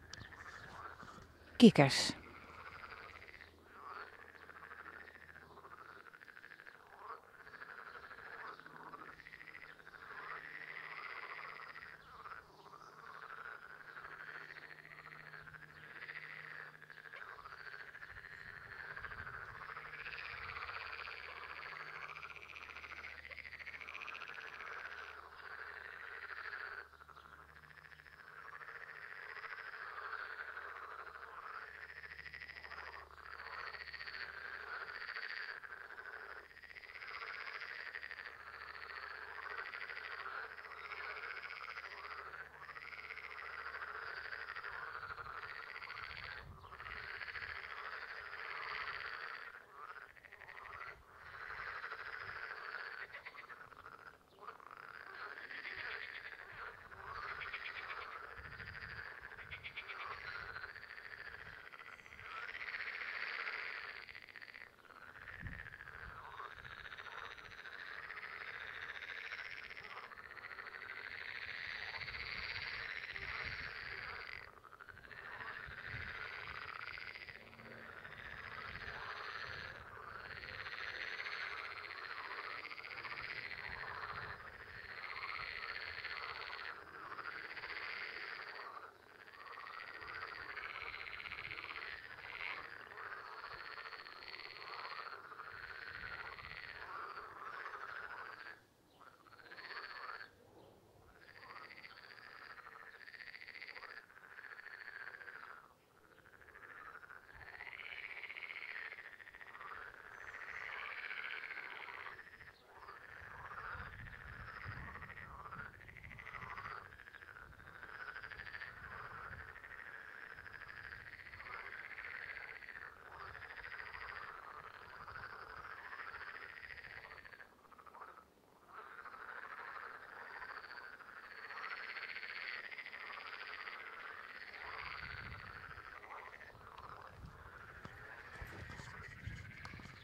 Outside the house a recording of frogs in spring
frogs, farmhouse, field-recording, spring, birds, whistling